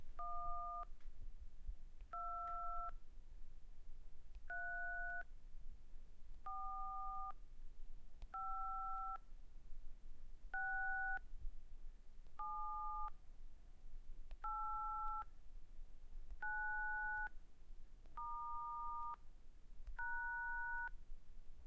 Dialling digits, long release
Dialling all digits (including # and *) on my cell phone. Long release of the keys.
ring, digits, alert, telephone, phone, tone, dialling, dialtone, Dial